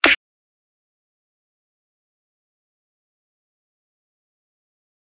Clap sound made on Linux MultiMedia Studio (LMMS).
percussion, drum-loop, clap, hat, drum, groovy, percs, sticks, kick